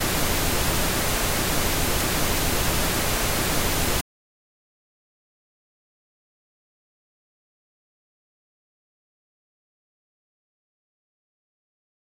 Surround Test - Pink Noise
Pink noise is played at -6dBFS over each channel in the following order: L R C sub Ls Rs.
See this pack for full surround test sound.